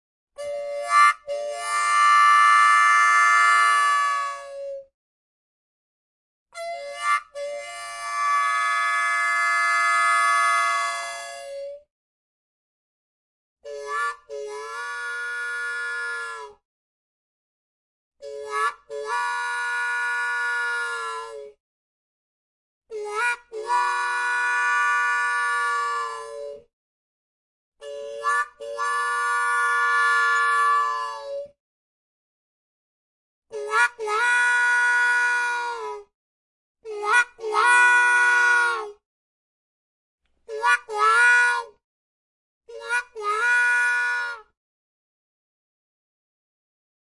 Blues Harmonica "Train Whistles"
Hohner Blues Harp in C, played cross-harp (G major) for multiple “train whistle” sounds. First played high (D and F), then lower (B and D) , then a little faster. Recorded about 10 inches away with TASCAM DR-05. Multiple takes were trimmed and assembled in Audacity.